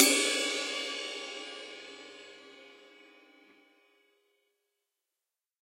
ZildjianKCustom20MediumRideCymbalWash
Zildjian K Custom 20 Inch Medium ride cymbal sampled using stereo PZM overhead mics. The bow and wash samples are meant to be layered to provide different velocity strokes.